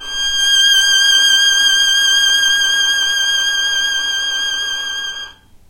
violin arco vib G5
violin arco vibrato
arco, violin